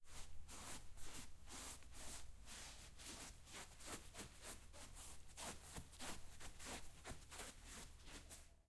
Foley - Feet shuffling and sweeping on carpet
Field recording - recorded with a Zoom H6 in Stereo. Footsteps and foot shuffling on a carpet on the cement floor of a suburban garage.
carpet, field-recording, foot-shuffling